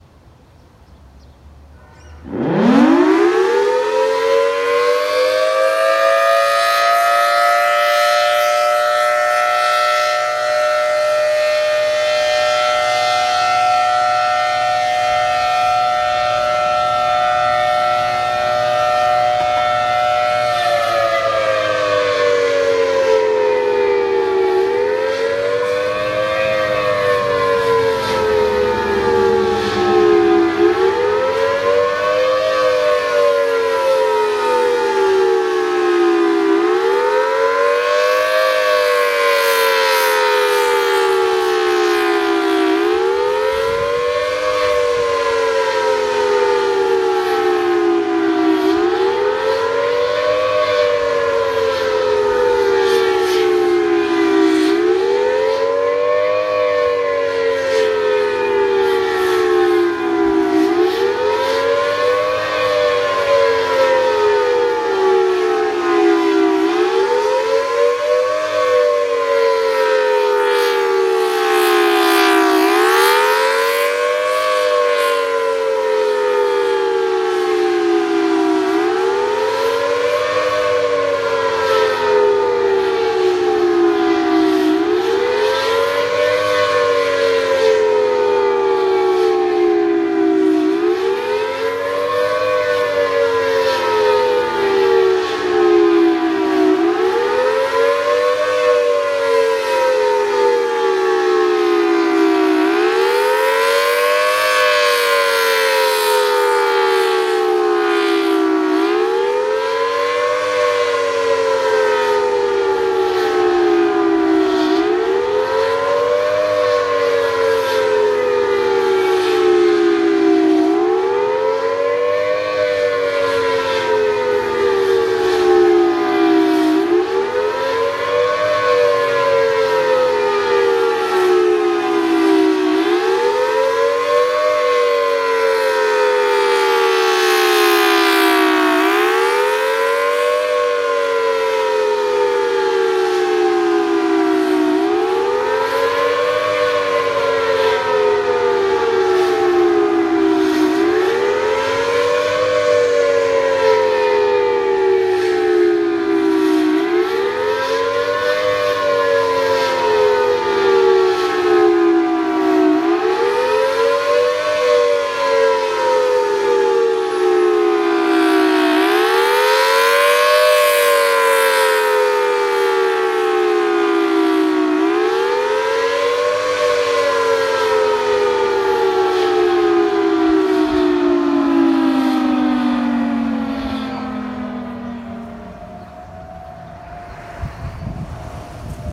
Thunderbolt in Fast Wail
Recorded May 23rd 2022 at noon for the 70 year anniversary of the first siren test in Sedgwick County, Kansas. (April 22, 1952)
50-65 feet from the siren.
This thunderbolt was installed in 1952 and remained until sometime between 2012 and 2015 when the siren head was replaced with a newer bolt. The original controls and blower remain, however.
dual-tone-thunderbolt, federal-signal-siren, thunderbolt, Thunderbolt-1000T, thunderbolt-attack, thunderbolt-fast-wail, tornado-siren, tornado-warning-siren, wichita-kansas, wichita-kansas-tornado-siren